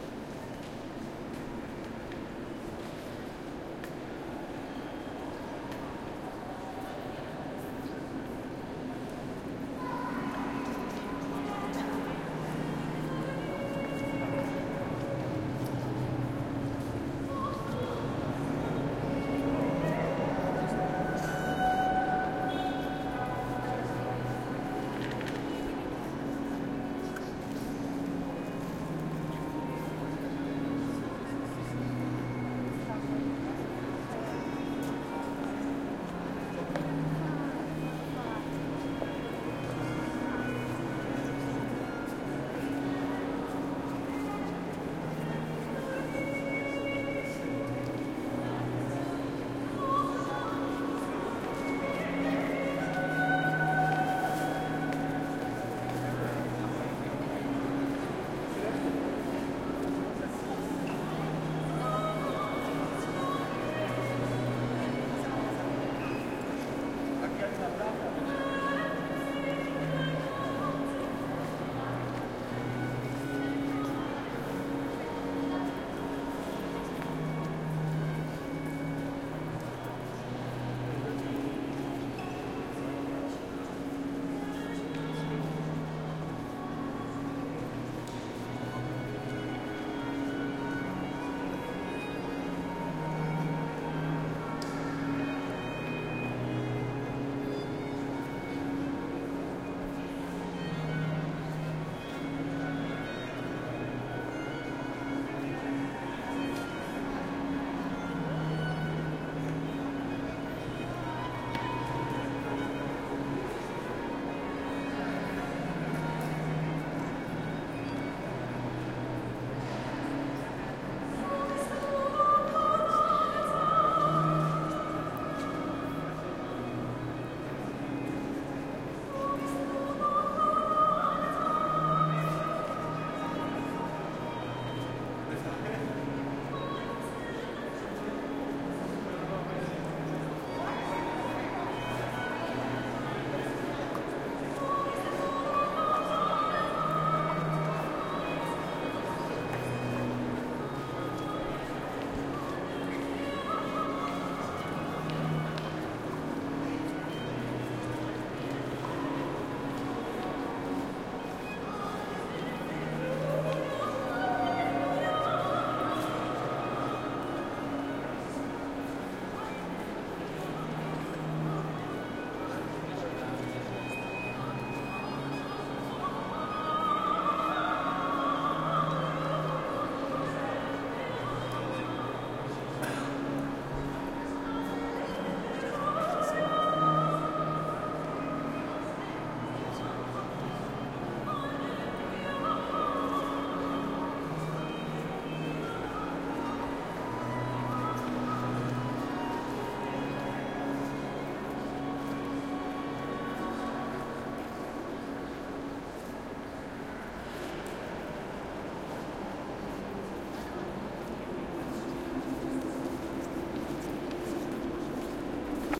This recording is done with the roalnd R-26 on a trip to barcelona chirstmas 2013